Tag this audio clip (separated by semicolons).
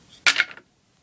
crash; drop; hit; impact; wood; wooden